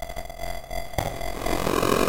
8but Thud
Rising 8-bit hit.
experimental, sfx, 8-bit, hit